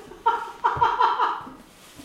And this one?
bolivar laugh01
somebody laughing in a beach house at bolivar peninsula
female, funny, giggle, happiness, happy, human, humor, jolly, joy, laugh, laughter, voice, woman